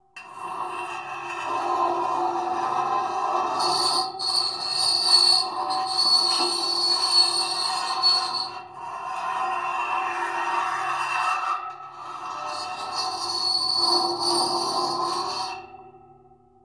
Contact Mic - Metal on Metal 2
A set of keys being scraped against a metal gate recorded with a contact mic